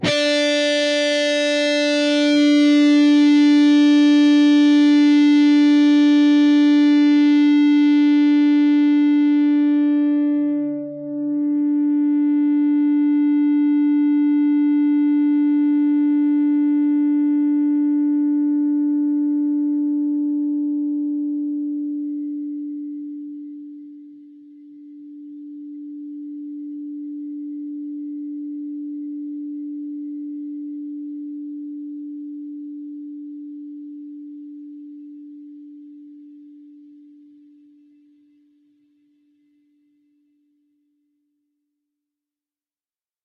Dist sng D 4th str 12th frt Hrm
D (4th) string, 12th fret harmonic.
single, distorted, strings, guitar, distortion, single-notes, guitar-notes, distorted-guitar